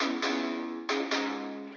Guitar Electric Lead 1
These sounds are samples taken from our 'Music Based on Final Fantasy' album which will be released on 25th April 2017.
Electric, Guitar, Samples, Music-Based-on-Final-Fantasy